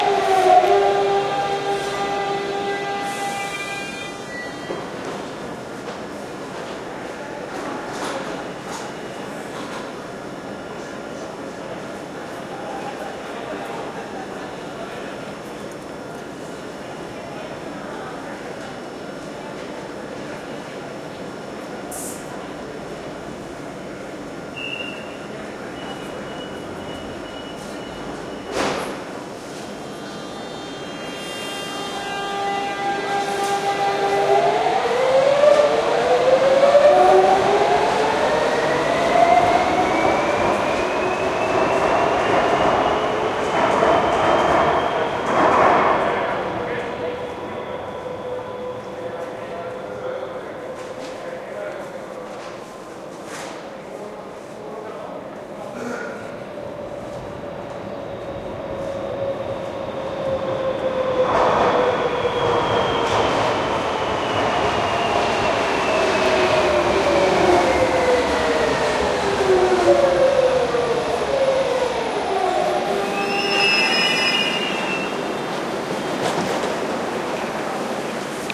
20100130.subway.station
Trains arrive and depart from San Bernardo station,in Madrid subway. Olympus LS10 internal mics
field-recording, ambiance, city, train, tube, subway, station